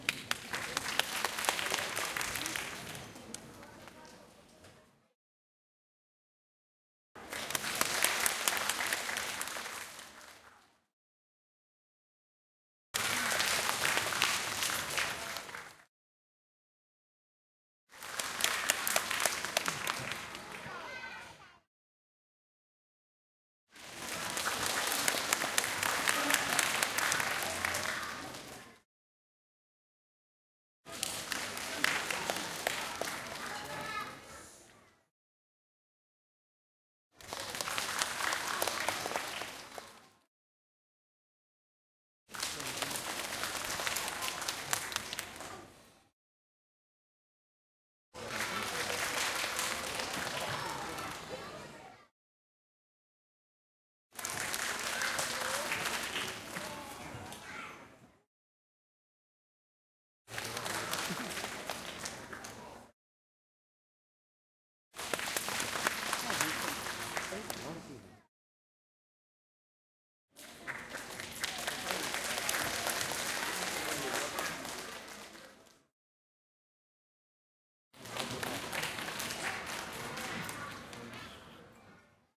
190627 clapping crowd a scatter of applause
crowds with fewer clapping
clapping, applaud, applause